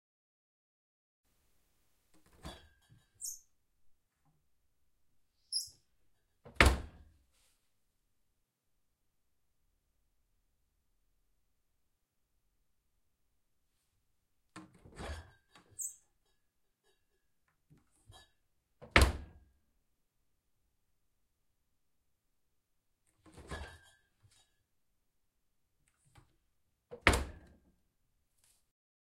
Open close fridge
Opening and closing a fridge door. This is a small, built-in British-sized fridge with a few bottles in the door.
door, refrigerator, close, fridge